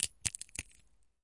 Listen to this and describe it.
shell crunch - wide 01
Crushing an egg shell.
Recorded with a Tascam DR-40 in the A-B mic position.